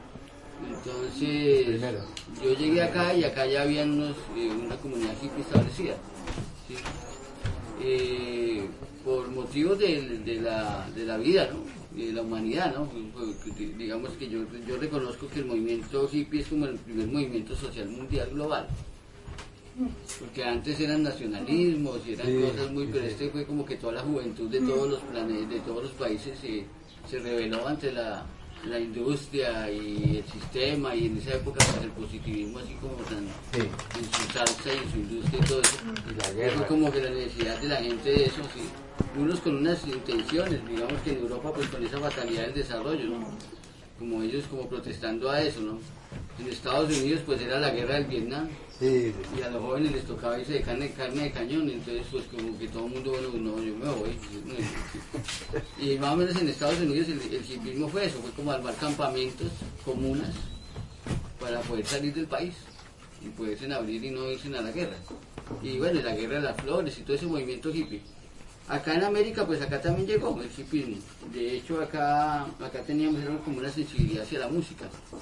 23Toma1PALABRASTONAGUALT2MovimientoHippie
grabacion-de-campo, palomino-sounds, SIAS-UAN-project